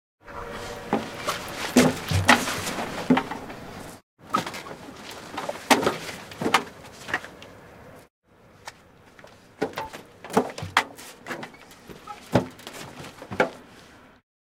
Auto Rickshaw - Getting In, Getting Out, Getting In
Bajaj Auto Rickshaw, Recorded on Tascam DR-100mk2, recorded by FVC students as a part of NID Sound Design workshop.
Autorickshaw, Ric, Rick, Auto, Richshaw, Tuk, India